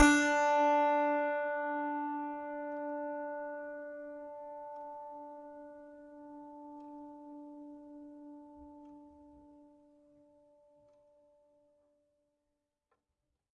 a multisample pack of piano strings played with a finger